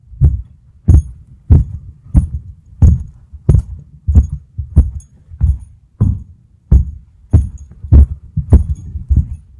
Godwalking [MEDIUM]
Atmosphere, Halloween, Horror, Scary, Terror